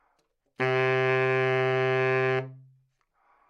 Sax Baritone - C#3
Part of the Good-sounds dataset of monophonic instrumental sounds.
instrument::sax_baritone
note::C#
octave::3
midi note::37
good-sounds-id::5286